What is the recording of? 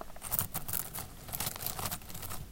Short potpourris rustling sound made by stirring a bowl of it
rustle, potpourris